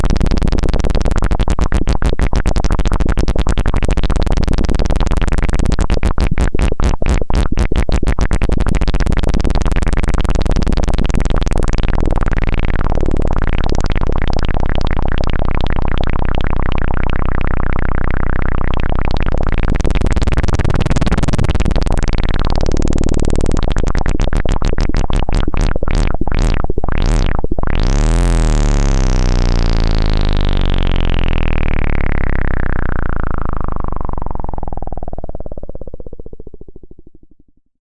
made a sound from space
poop, synth, 8bit, effect, korg, diara, analog